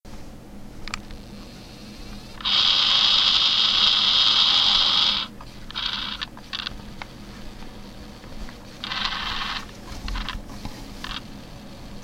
MD noise
The sound of a MiniDisc recorder spinning the disc to a blank spot before beginning to record.